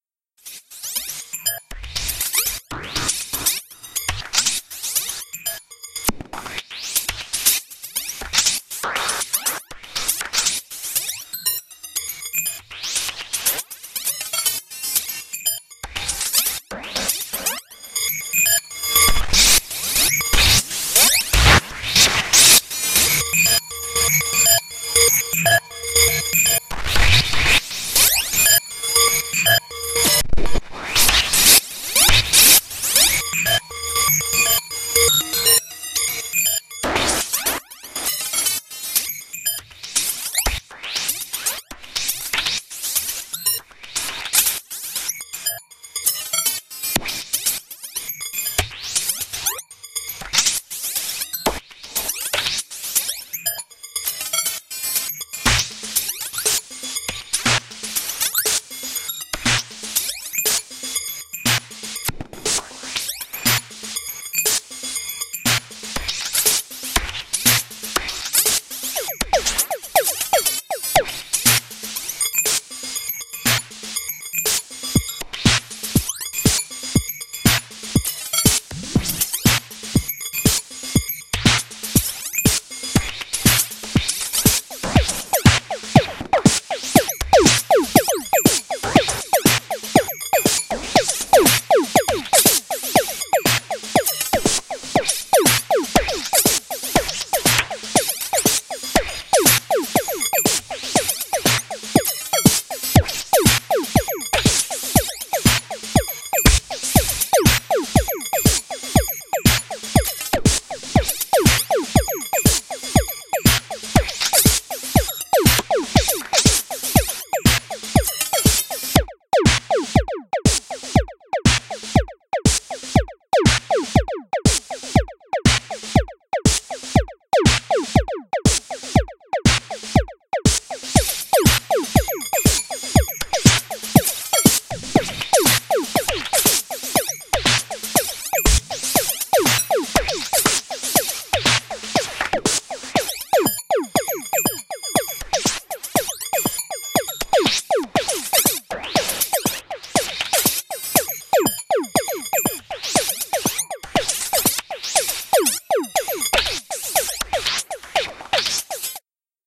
VCV Rack patch
bass, beat, dance, digital, drum, electronic, loop, modular, synth, synthesizer